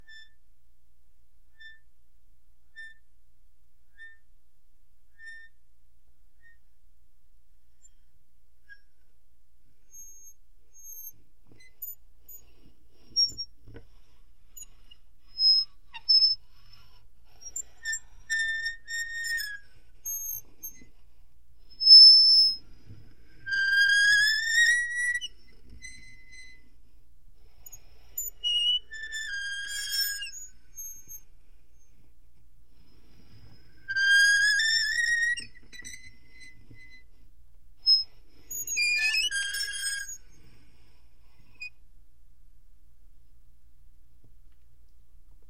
I recorded these sounds made with a toy meat grinder to simulate a windmill sound in an experimental film I worked on called Thin Ice.Here are some slow squeaks with a toy meat grinder.